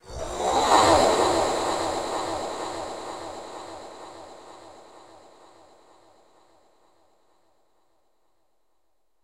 Whoosh snd yt56y
A re-edit of this sound::
Time stretched, delayed, reverbed, then delayed again.